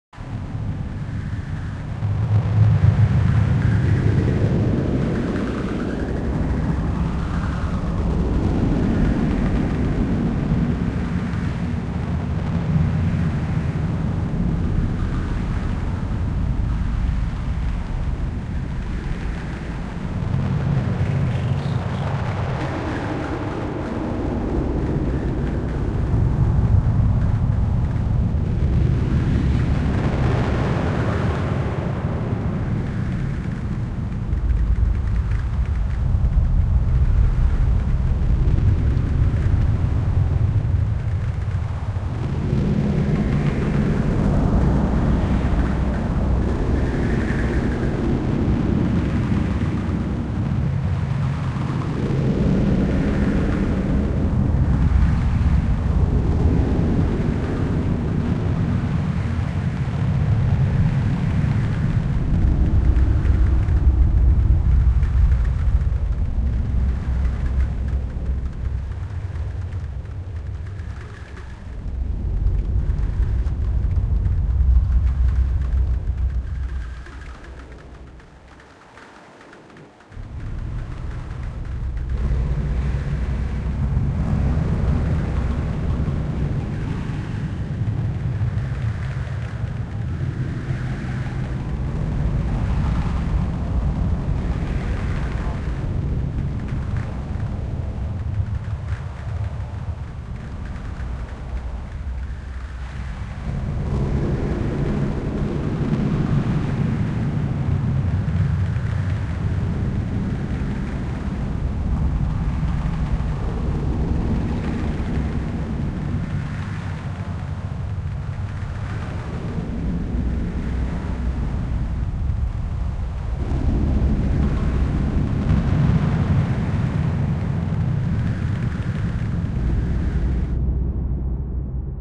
Thunder Storm Fantasy Atmosphere